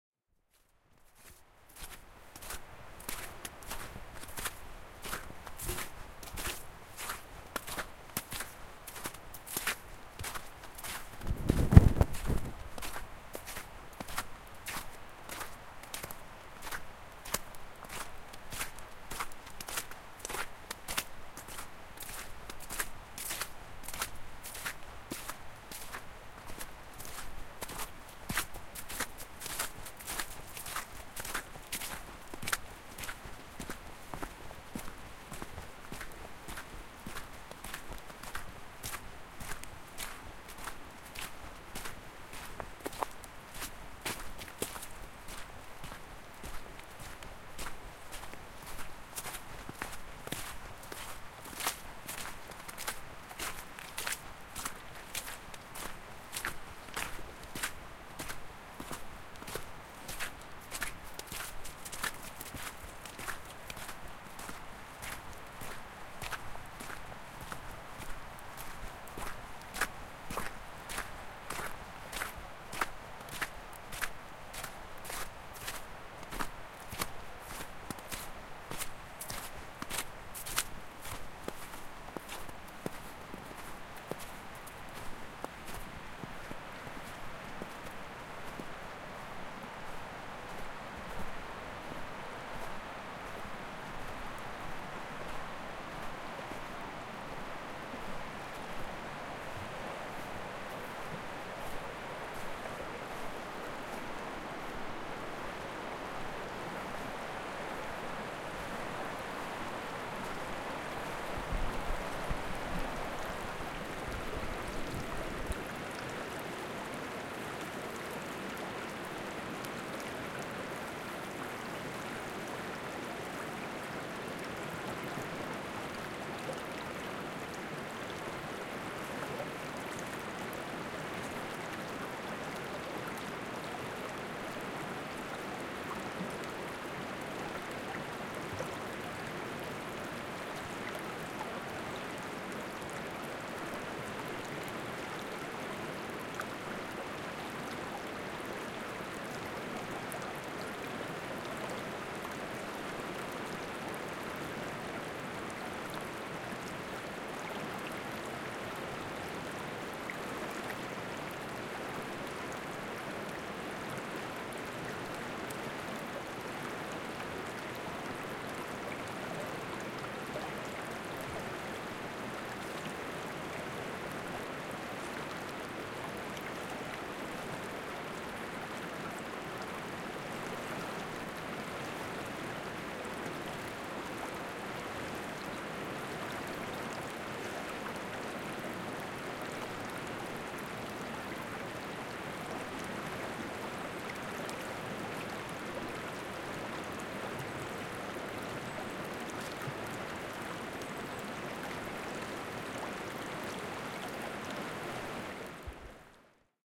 Walking to River
Walking to the river in the snow recording with an H4N Zoom.
river, snow, walking